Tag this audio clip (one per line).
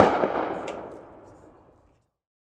shot
clip
gun
foley
pistol
cock